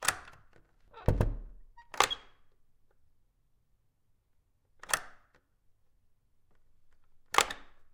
House Door Lock And Close Interior
House door lock and closed. Recorded using an Oktava MK012 and Marantz PMD661 recorder.
close, closing, door, doors, house, lock, opening, wooden